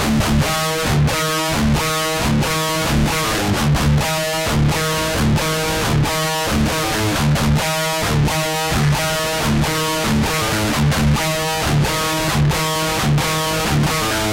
REV GUITAR LOOPS 6 BPM 133.962814
HEAVYMETALTELEVISION, 2INTHECHEST, DUSTBOWLMETALSHOW, GUITAR-LOOPS, 13THFLOORENTERTAINMENT